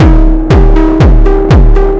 Dusty Piano Loop 1
I little loop series with a piano. These ones are really fun. I made it with Digital Thunder D-lusion. DT is an analogue drum machine.